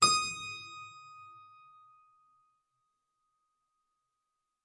Harpsichord recorded with overhead mics
instrument, stereo, Harpsichord